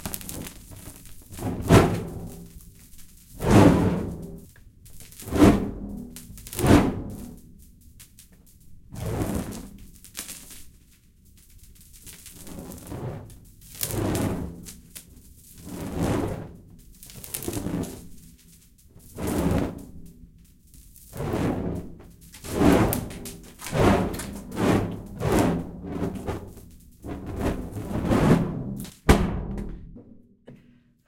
torch slow movements
Field-recording of fire using spray-can with natural catacomb reverb. If you use it - send me a link :)
reverb, fire, movement, slow, spray, can, flame